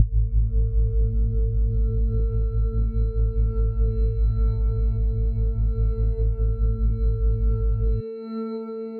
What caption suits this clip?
Wobbly Space SFX
ambient, atmosphere, sci-fi, sfx, ship, sound-effect, space, space-ship, wobble